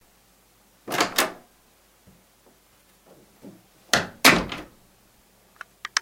Open/close door
A recording of a person opening and closing a door. The blank space in between the opening and the closing can be taken out. This would be a great piece of audio to use in a movie or video.
close, close-door, closing, closing-door, Door, open, open-door, opening, opening-door, shut, shut-door, shutting, shutting-door, wood, wooden